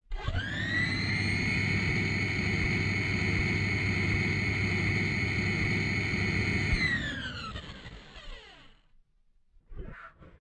Sound of an engine starting and shutting down.
Generator, engine, industrial, car, start, motor, factory, machine, mechanical, machinery, vehicle